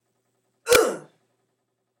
Patrick's Grunt
grunt, sound, patrick